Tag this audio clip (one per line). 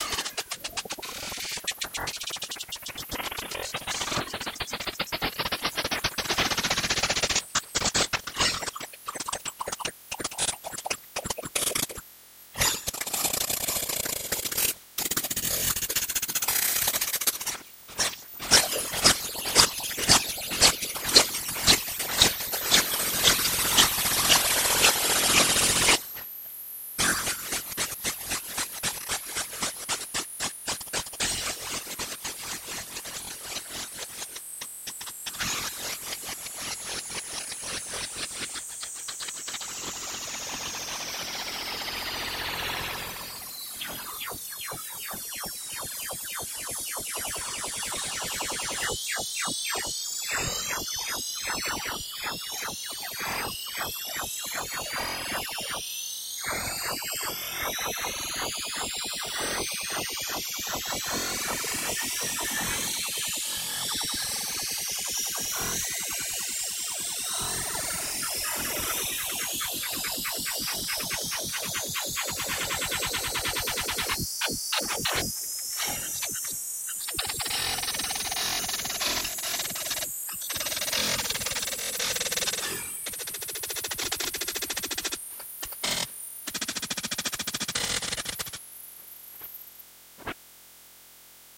digital
electronic
freaky
glitch
lo-fi
modulation
noise
sci-fi
sound-design
strange
warp
weird